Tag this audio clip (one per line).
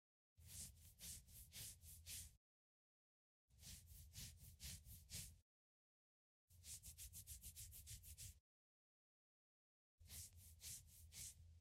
OWI
scratch
scratching
itch
thinking